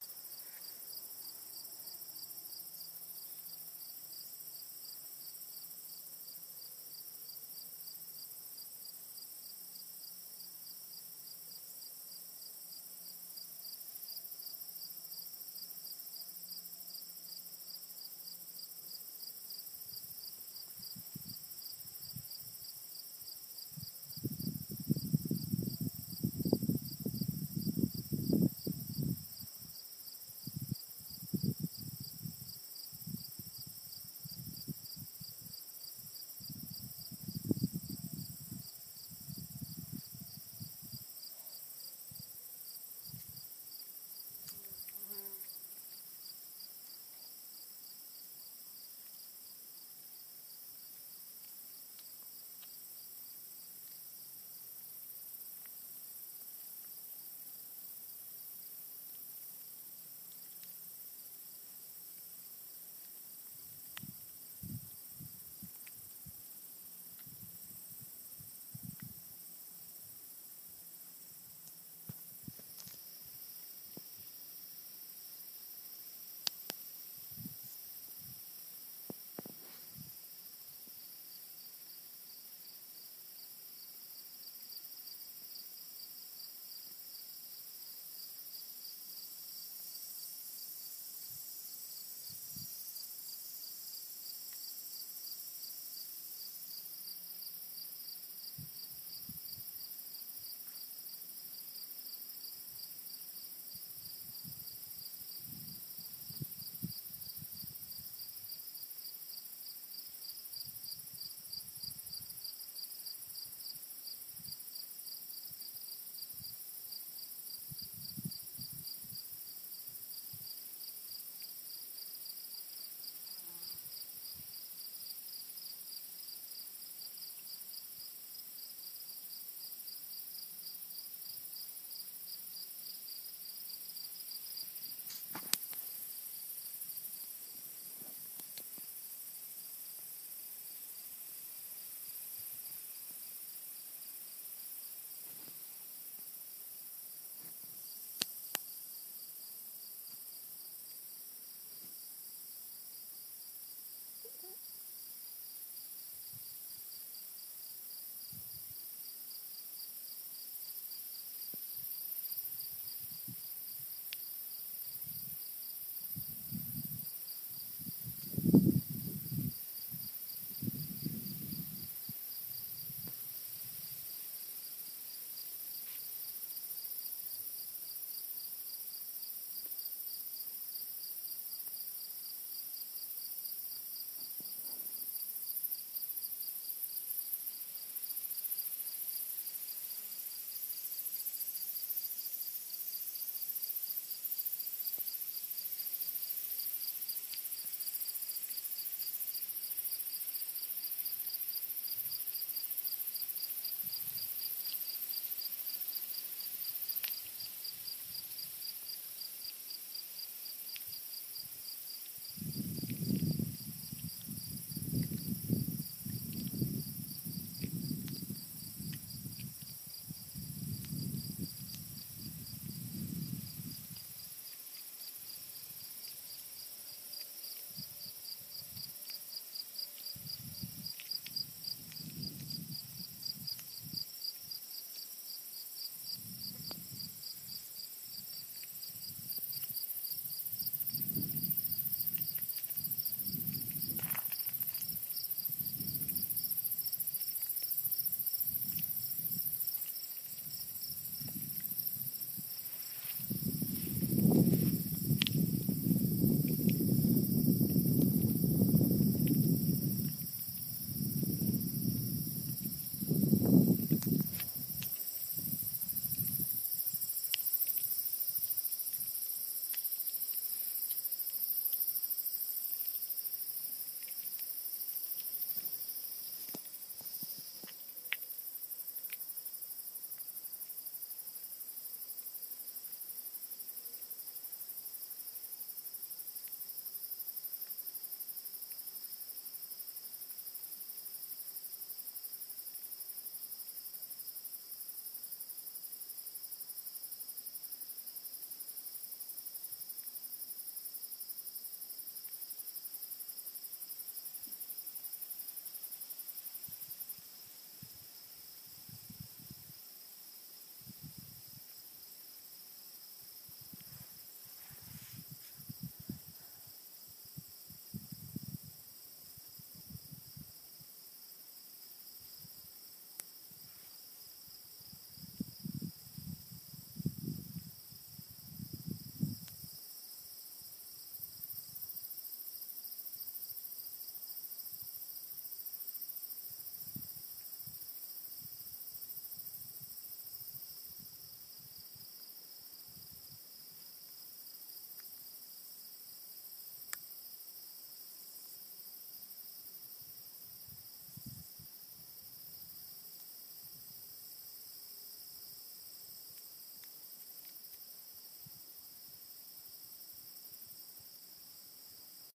country crickets
Nature field recording on a dirt road near Amber Lake, a private lake in Livingston Manor, New York, USA.
crickets, nature, birds, summer, field-recording, country